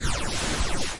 Stages of Production :
I created this sound using pink noises to which I added phaser twice. I created several pists to make this binaural sound and added fade-in and fade-out effects to perfect this science-fiction like sound.
Descriptif selon la typologie de Schaeffer :
Code correspondant : X
Analyse morphologique de l’objet sonore :
1) Masse
On peut entendre des sons complexes mêlés à certaines hauteurs donc nous pouvons parler de sons cannelés.
2) Timbre harmonique
Electrisant
3) Grain
Le son comporte du grain d’itération.
4) Allure
L’allure est mécanique, ce son est assez électrique, du coup on peut noter une impression de vibrato.
5) Dynamique
L’attaque est abrupte, très peu graduelle dû au très court fondu en ouverture.
6) Profil Mélodique
Le profil est ascendant puis descendant donc on peut parler de variation serpentine.
7) Profil de Masse
Il s’agit d’un profil de masse en creux.